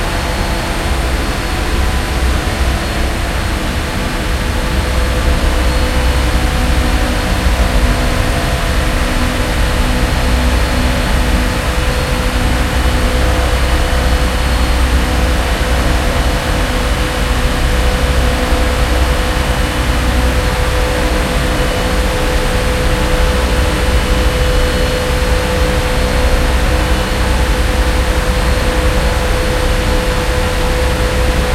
ferry engine room1
Sound of the engine on the Cape May-Lewes ferry boat. Recorded at the top of the stairs leading down into the engine room.
boat, drone, engine, engine-room, ferry, ferry-boat, noise, ship